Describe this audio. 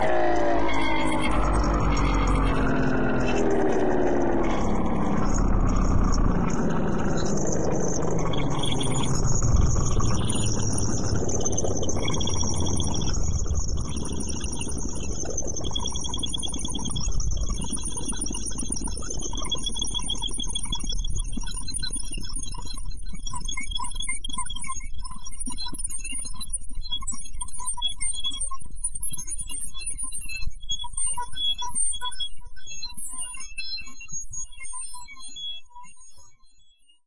Motor slowing down synthetically